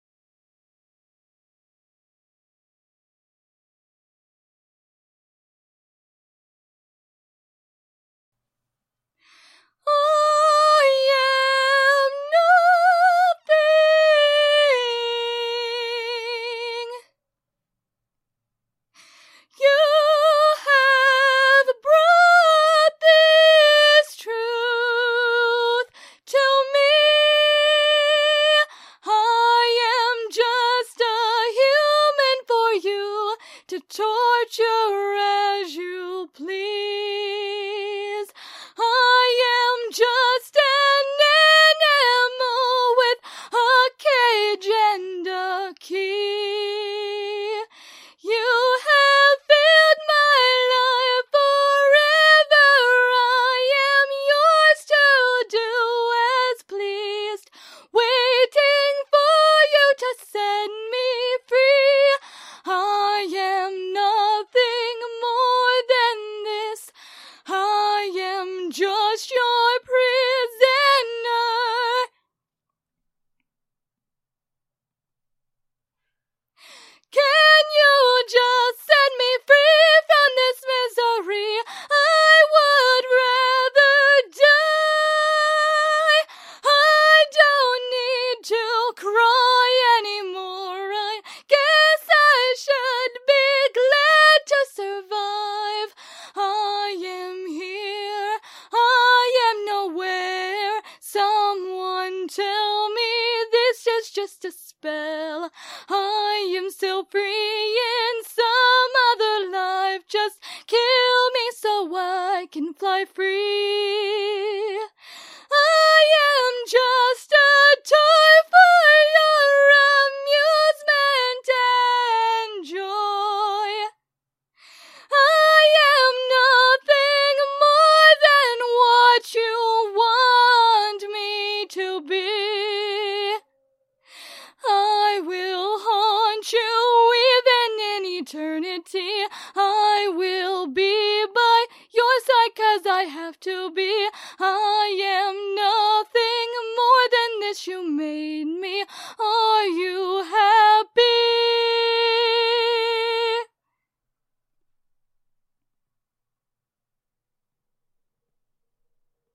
Are You Happy Original Song
Singer, Girl, You, Sing, actress, Original, Female, Voice, Song, Human, Woman, Are, actor, Happy